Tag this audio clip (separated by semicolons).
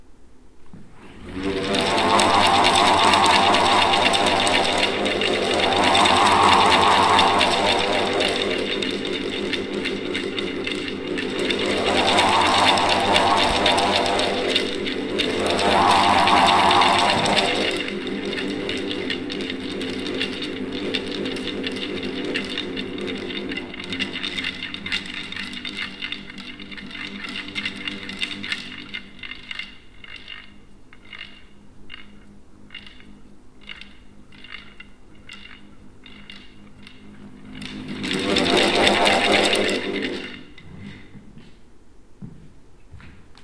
rubber; blades; fan; bullroarer; band; air; turbine; propeller; rotate; rotor